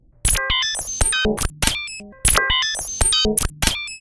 WonkTone 120bpm01 LoopCache AbstractPercussion
Abstract Percussion Loop made from field recorded found sounds
Abstract, Loop